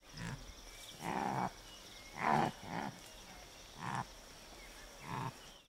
Impala male-Cherchant femelle
An antelope (impala) which is looking for a girlfriend in Tanzania recorded on DAT (Tascam DAP-1) with a Sennheiser ME66 by G de Courtivron.